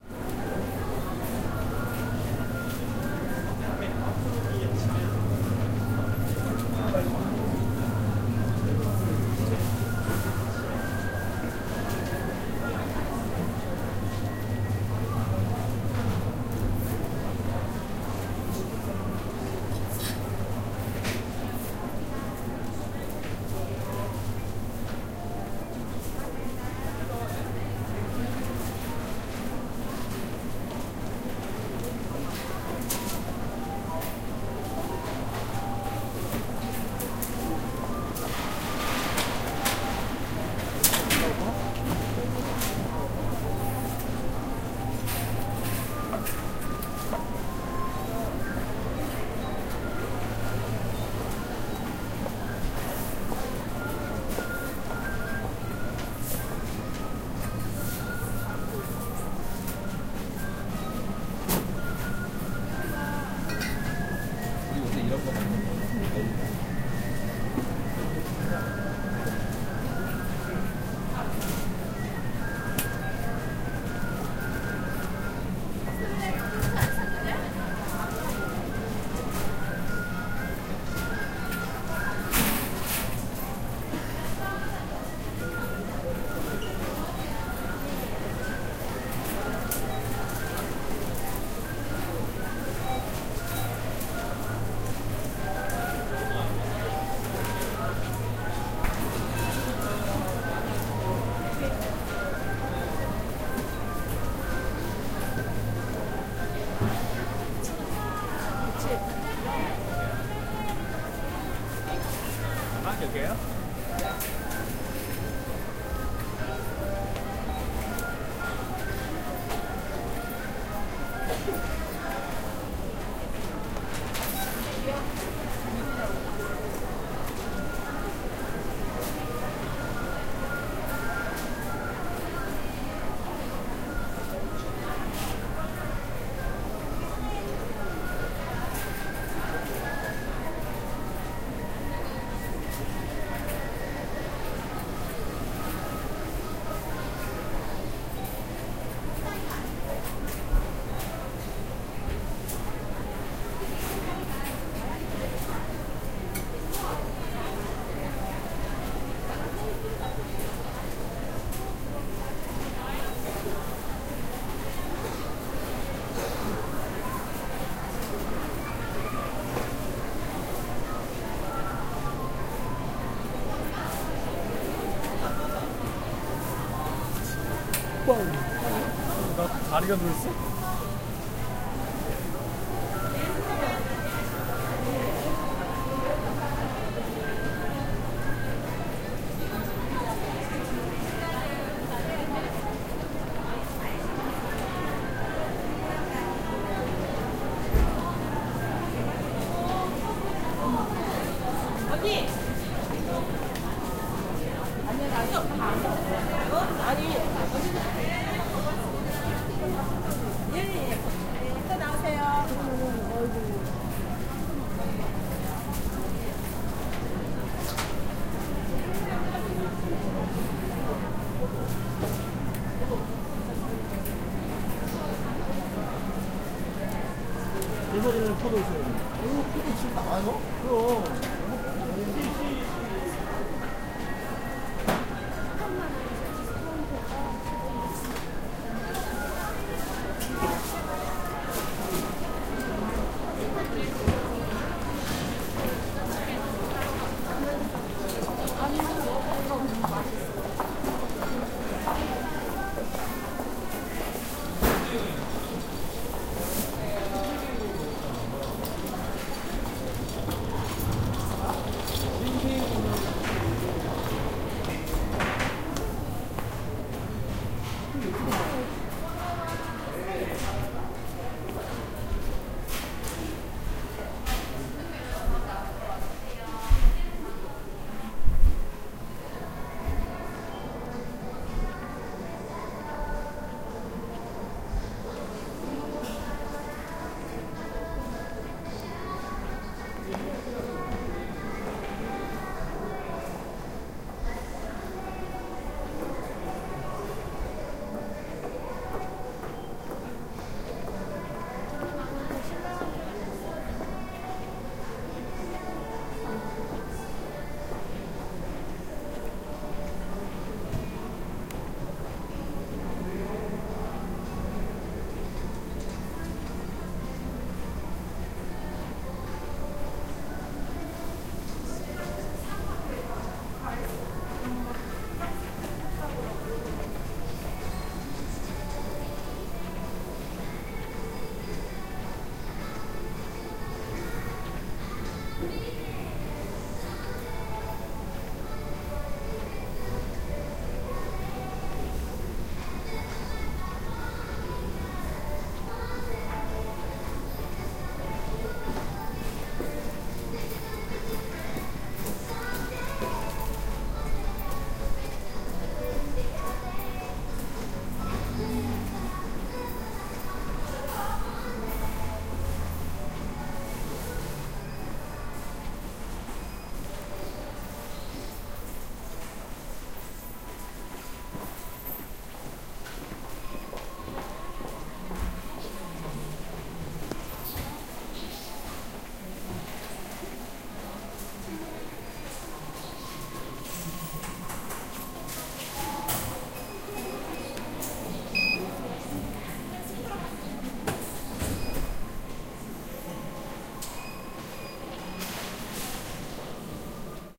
0140 Department store 5
Walking in a supermarket. People walking and talking. Music in the background
20120122
field-recording, footsteps, korea, korean, market, seoul, shop, voice